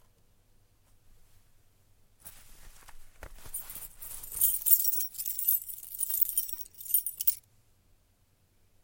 Key out of the pocket
A key pulled out of a pocket.